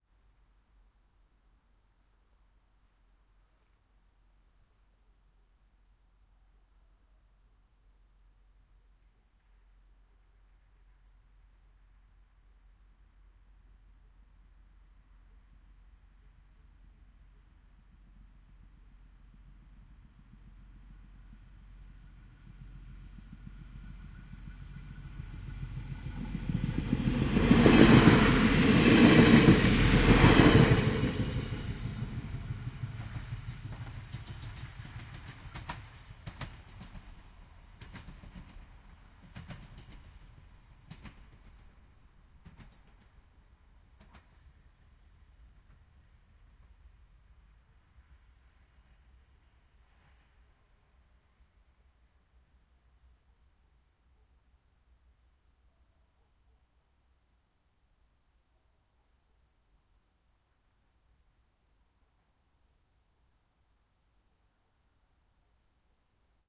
Train Passing By Medium Speed L to R Night Amb
Multiple takes of a train passing by.